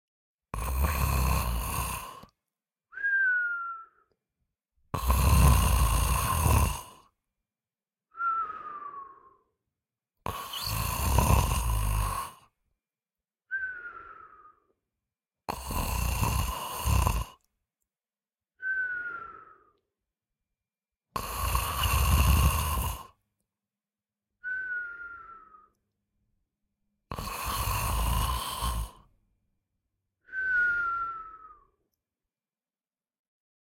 Male Snore 1
man-snoring, sleeping-male, snore, man-snores, sleep, male-snores, honk, male-snore, sleeping-man, male-snoring, nose, sleeping, snores